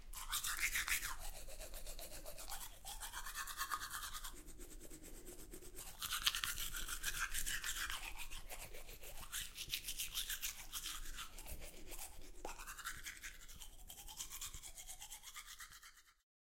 toothbrushing
ZOOM H6
water, teeth, toothbrushing, bathroom